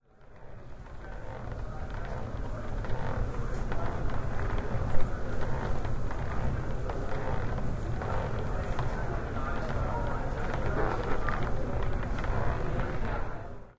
Berlin Metro (Underground) Mechanical Stairs 2008